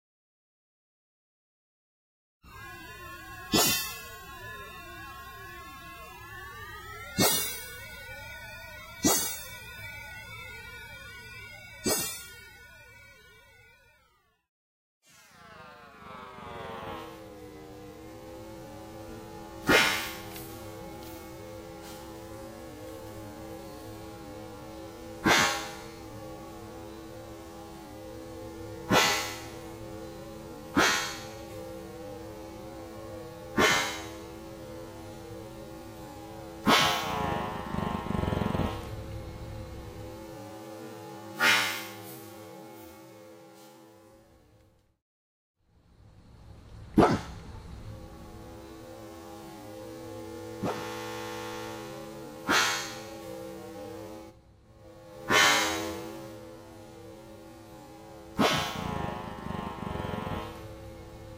This is a digital field recording of my bulldogge barking. It was edited with GRM tools, creating a warp effect.
bark, bulldog, bulldogge, dog, GRM, GRM-tools, warp